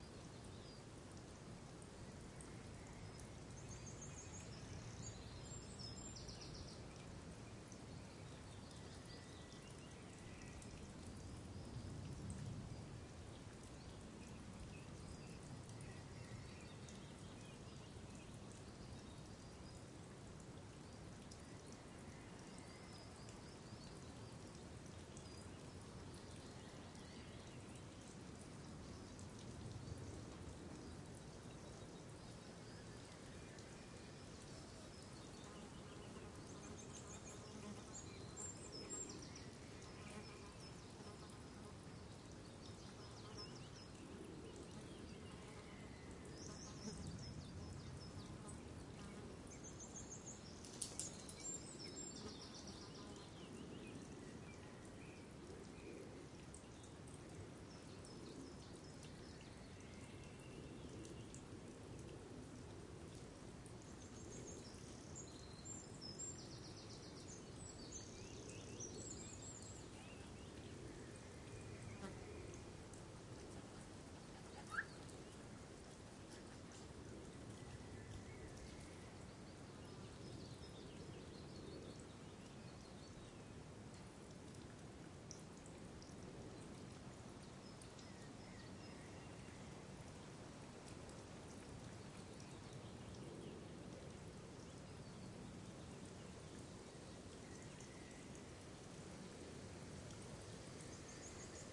Rural By Water
In the countryside, by water.- Recorded with my Zoom H2 -
countryside, resovoir, water, birds, rural, lake